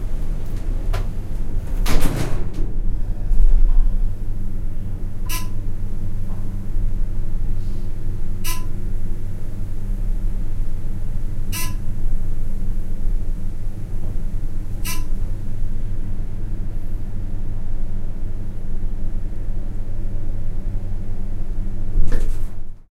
Arriving at a hotel on the beach, we had to go all the way up to the fourth floor. This is the elevator ride up.
buzz, buzzer, close, doors, elevator, hotel, lift, open, ride, riding